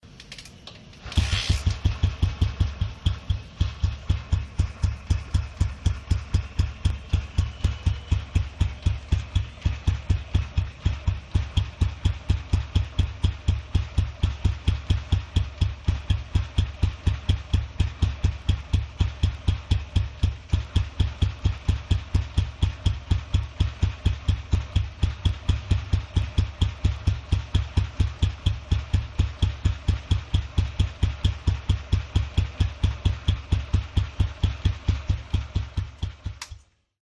The sound of my 1970 Royal Enfield Bullet.
Motorcycle, Idling, Bike, Start, Bullet, Enfield, Royal, English, India, Vintage, Old